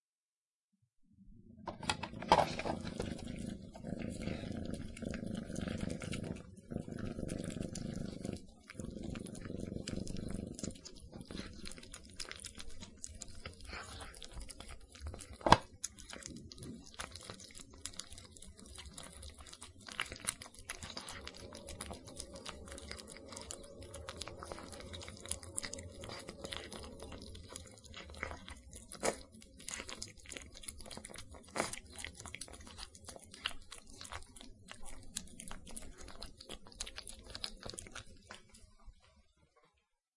Two hungry kittens rapidly eating mushy food, recorded very close in glorious stereo. Slight noise reduction artifact is audible.